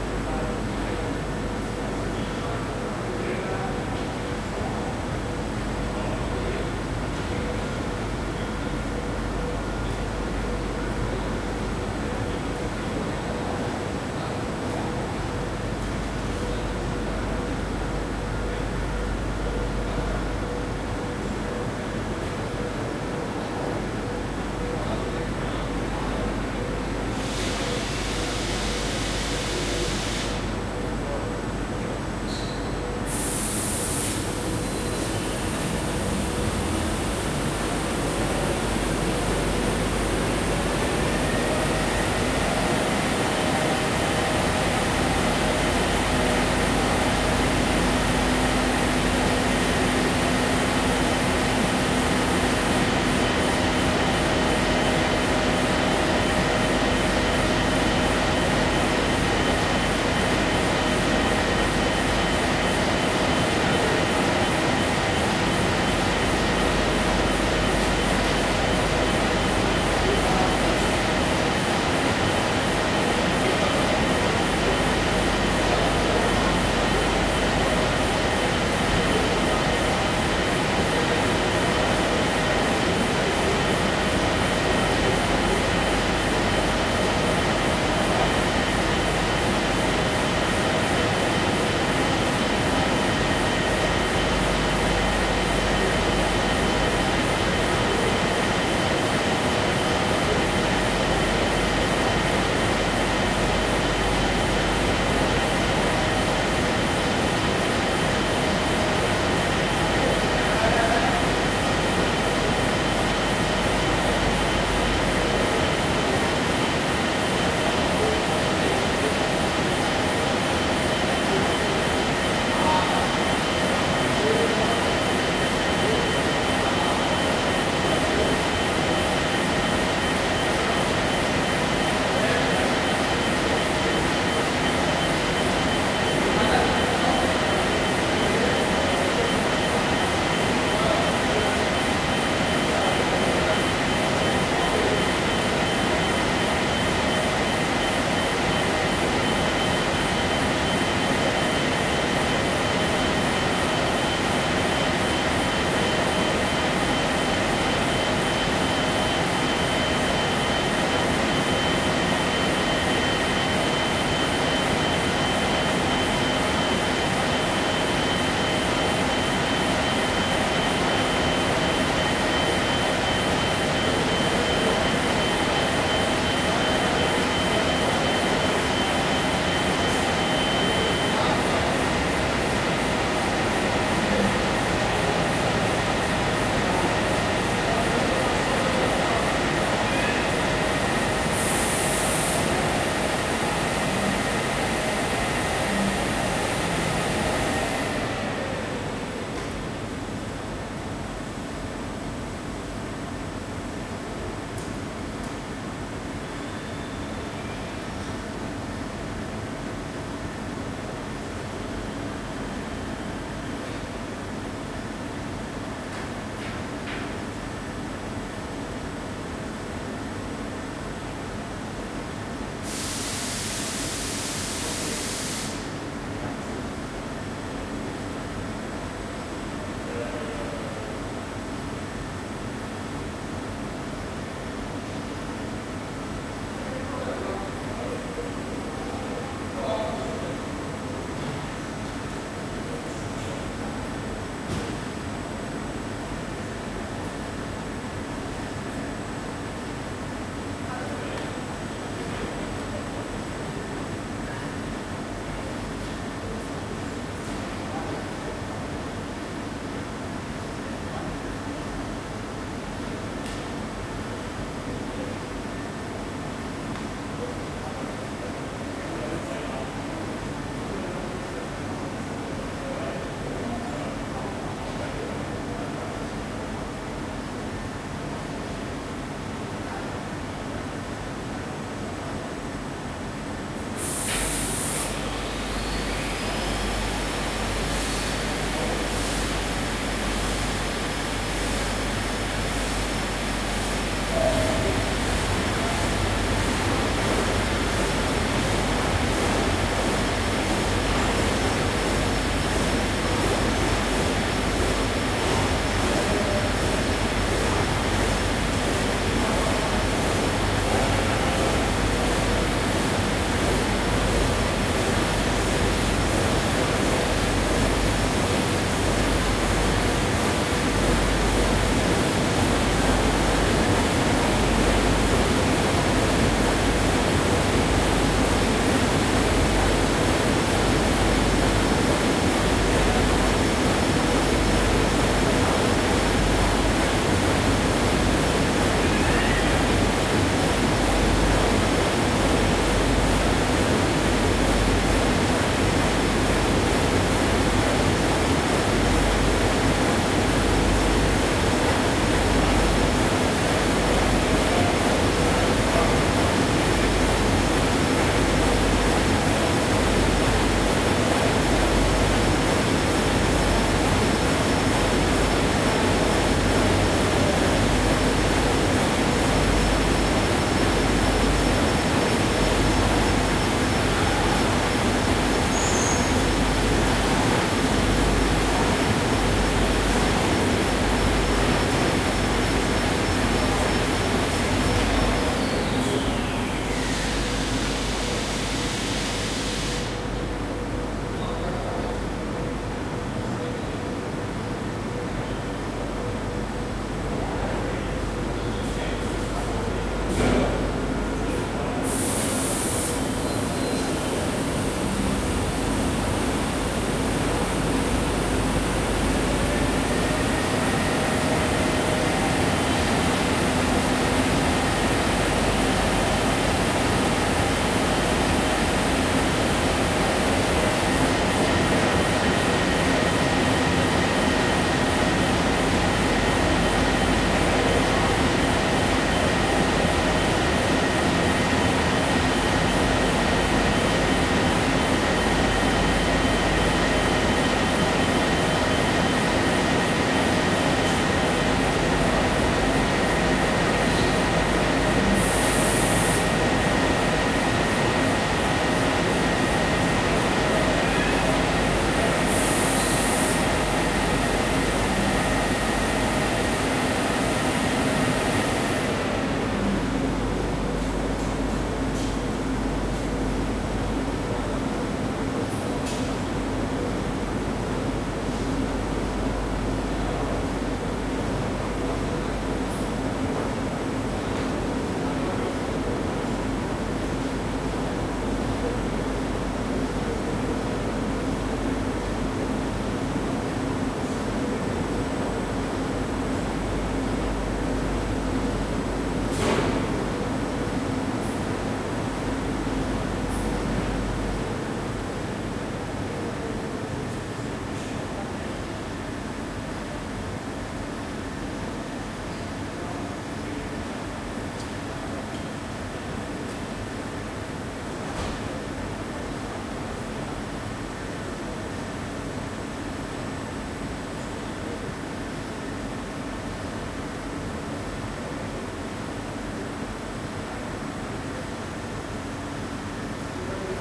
180619 BerlinKoenigsdruck WA RearHall ST
Stereo recording of the main printing floor of a printing firm in Berlin/Germany. Recording was conducted in the rear of the hall, printing machines are running and idling, some workers' voices can be heard in the background.
Recorded with a Zoom H2n, mics set to 90° dispersion.
This recording is also available in 5.1 surround. Drop me a message if you want it.